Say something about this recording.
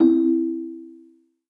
Bong Chime 2
Part of a games notification pack for correct and incorrect actions or events within the game.
alert, ambient, application, bleep, blip, bloop, cell, chime, click, computer, correct, desktop, effect, event, game, harmony, incorrect, indie-game, melody, music, noise, notification, ringtone, sfx, sound, tone, tones, videogame